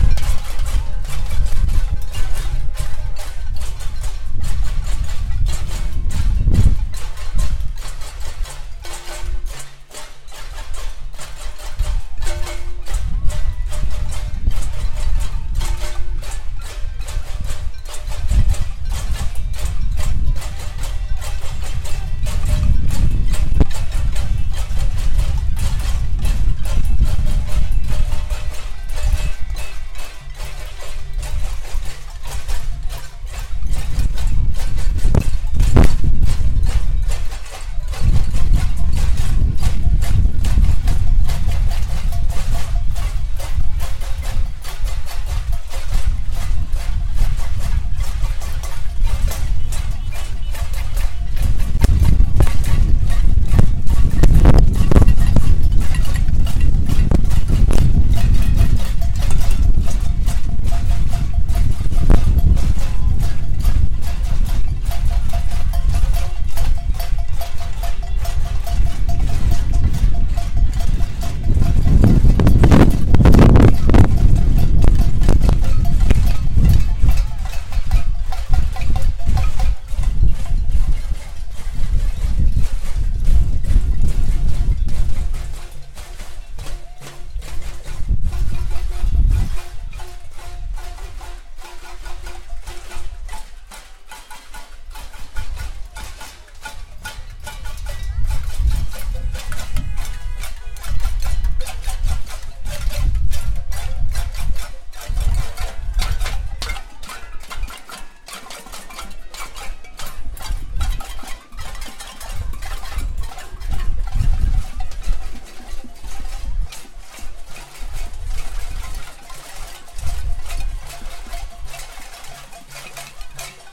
Casseroles, demonstration, montreal, noise.
Casseroles, demonstration, montreal, noise